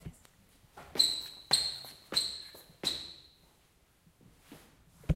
Sound Description: Quitschende Schuhe auf Fliesen - squaking shoes on floor
Recording Device: Zoom H2next with xy-capsule
Location: Universität zu Köln, Humanwissenschaftliche Fakultät, Gebäude 213, Erdgeschoss
Lat: 50°56'1"
Lon: 6°55'13"
Date Recorded: 18.11.2014
Recorded by: Patrick radke and edited by Vitalina Reisenhauer
2014/2015) Intermedia, Bachelor of Arts, University of Cologne